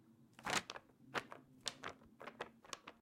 Waving Paper in the Air
notebook, waving, paper